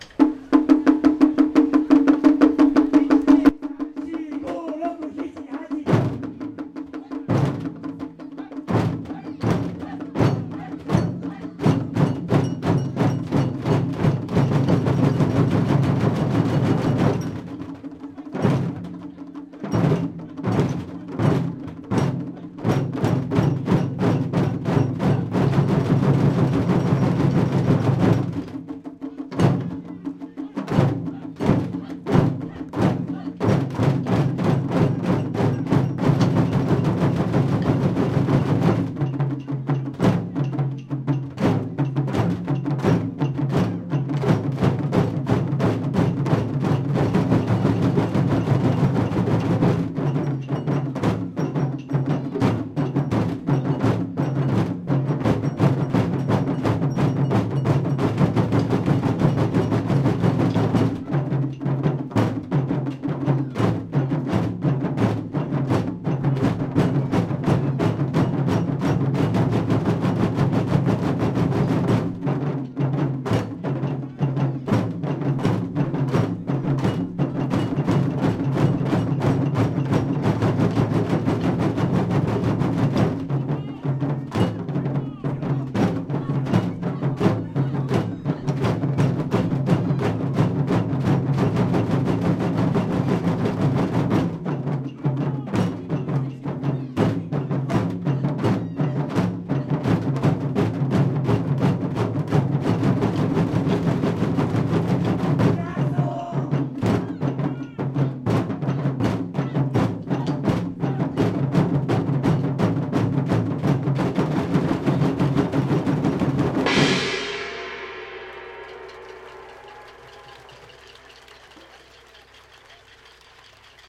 Taiko drummers short performance
drummers, performance